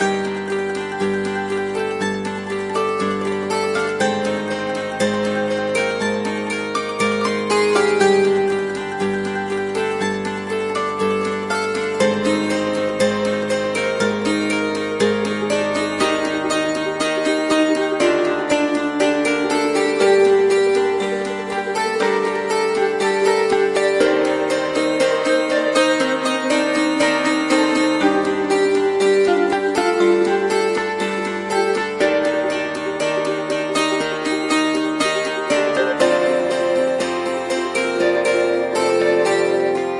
Loop NeverGiveUp 01
A music loop to be used in storydriven and reflective games with puzzle and philosophical elements.
game, Puzzle, games, sfx, gaming, videogame, Thoughtful, indiedev, videogames, indiegamedev, music, loop, music-loop, Philosophical, gamedev, video-game, gamedeveloping